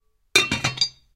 get a top on the pot metallic sound